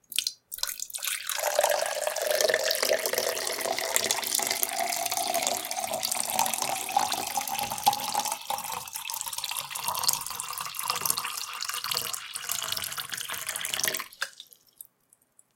Pouring water
creek, liquid, Pouring, relaxing, water